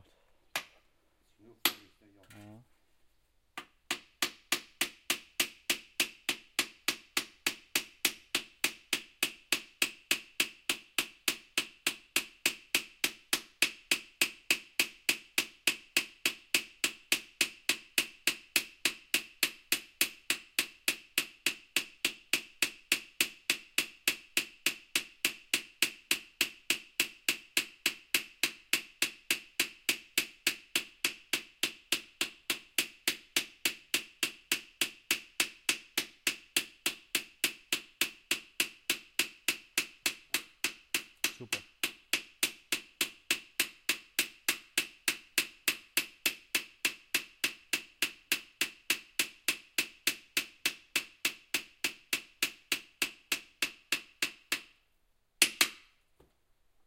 Sense dengeln
sharpening a scythe on an anvil
3d-recording, anvil, binaural, country, country-life, countryside, field-recording, scythe, sharpening, village